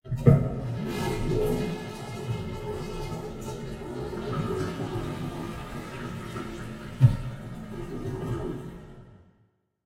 Just the flushing of a toilet... ...no other sounds associated with the toilet are included for your sake and mine.